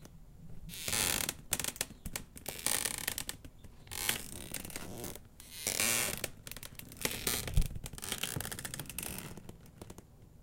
Me moving around in a plastic framed patio chair. I thought "This sounds like a pirate or ghost ship creaking" hmmmm.